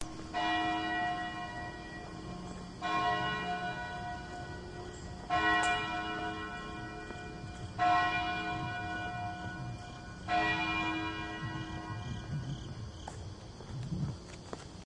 The Vanderbilt bell tower recorded one morning while I was on my way to work.
clang, tower